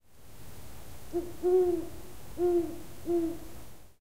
An owl hooting.